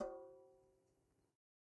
Metal Timbale left open 008
real, kit, garage, drum, timbale, conga, god, home, trash, record